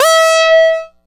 multi sample bass using bubblesound oscillator and dr octature filter with midi note name
synth, bass, sample, multi